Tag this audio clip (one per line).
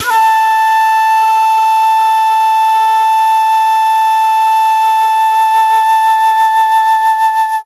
C
Dizi
Flute